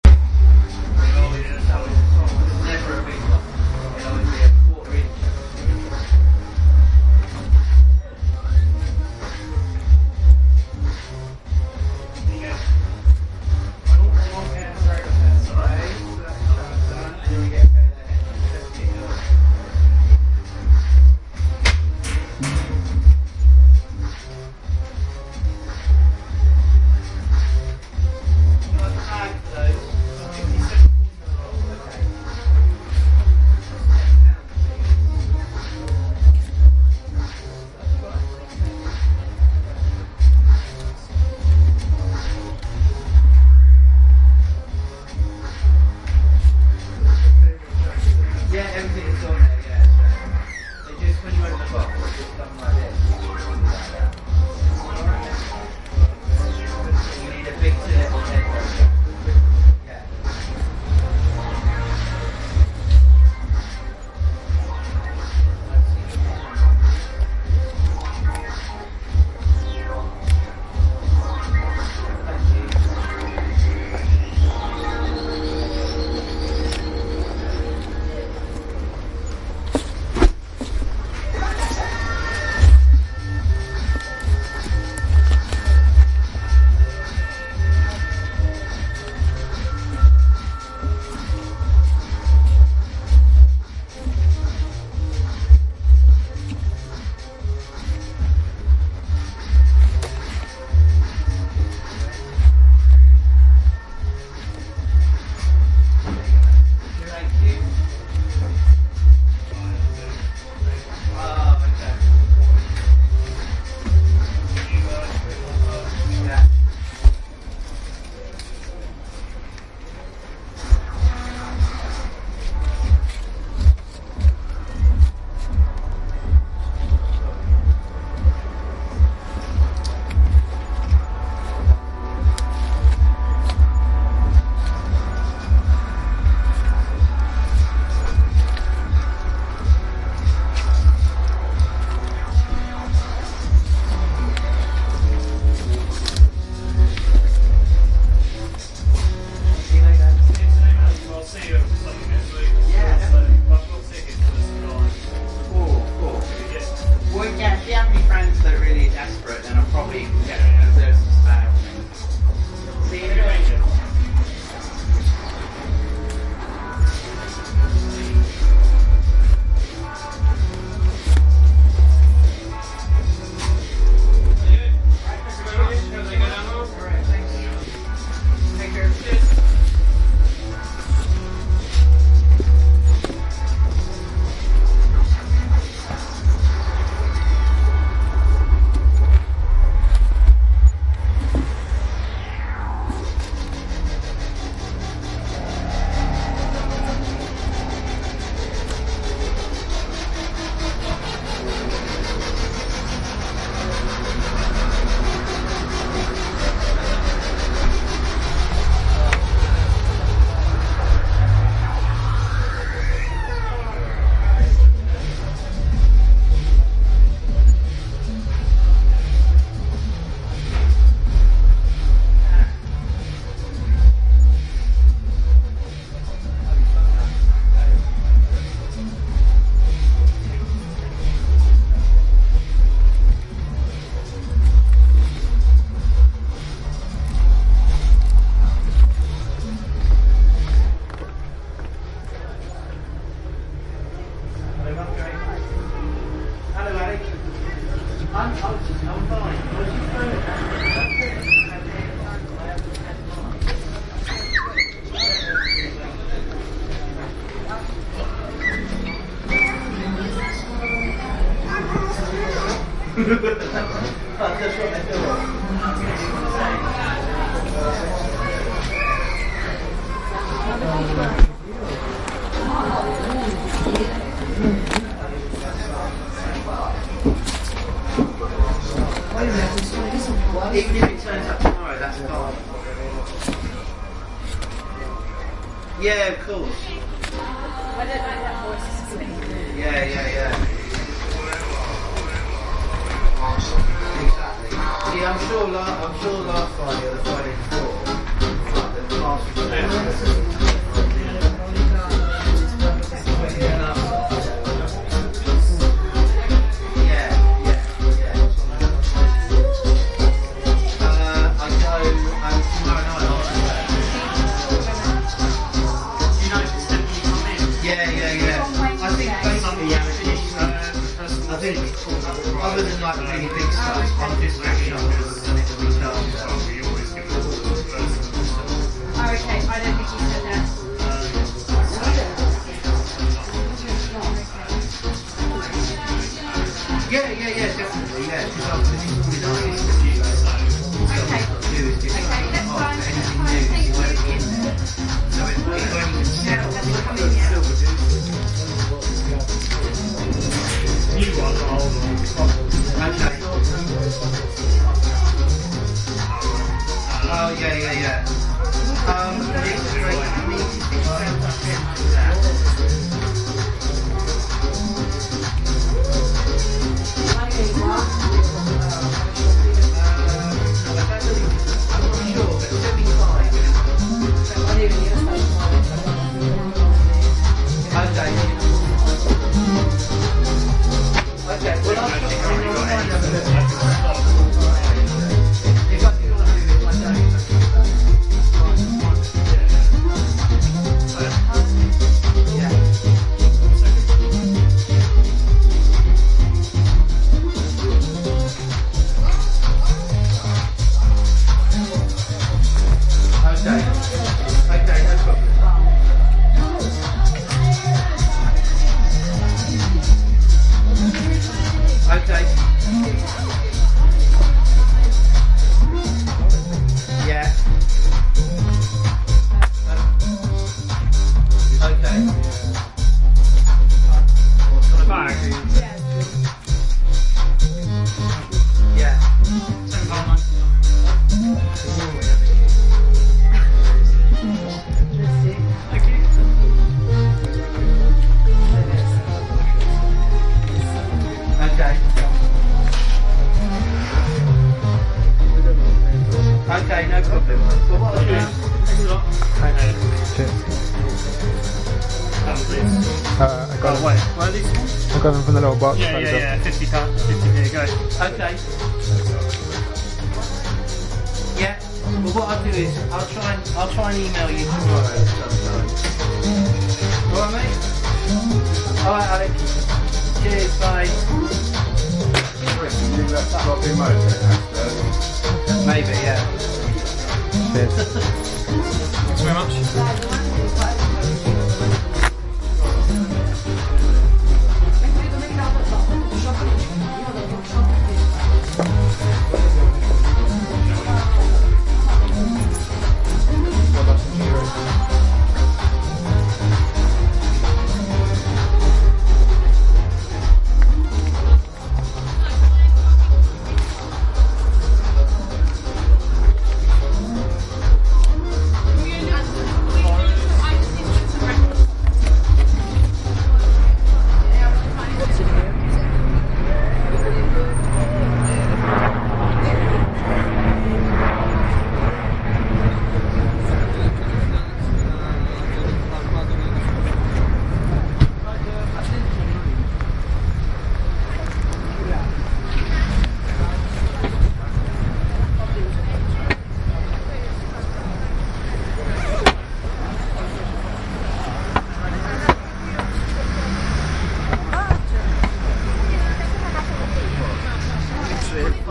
Notting Hill - Rough Trade Records on Portabello Road
ambiance, ambience, ambient, atmosphere, background-sound, city, field-recording, general-noise, london, soundscape